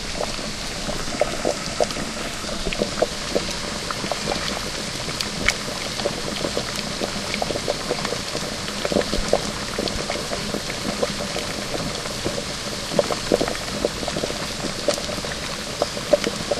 Close up recordings of Thanksgiving dinner preparations.